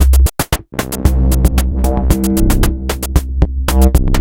Attack loop 114 bpm-07
It is a one measure 4/4 drumloop at 114 bpm, created with the Waldorf Attack VSTi within Cubase SX.
The loop has a low tempo electro feel with some expressive bass sounds,
most of them having a pitch of C. The drumloop for loops 00 till 09 is
always the same. The variation is in the bass. Loops 08 and 09 contain
the drums only, where 09 is the most stripped version of the two.
Mastering (EQ, Stereo Enhancer, Multi-Band expand/compress/limit, dither, fades at start and/or end) done within Wavelab.